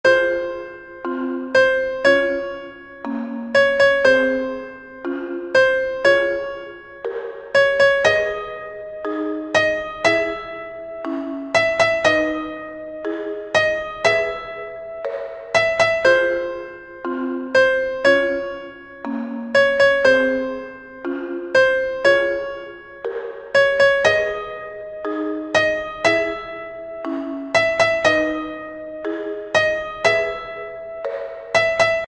My Song 11
Smooth rap melody.
It’s T0X1C!